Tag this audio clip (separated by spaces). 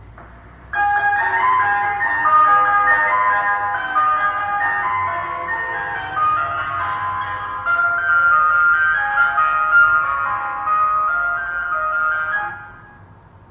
van ice cream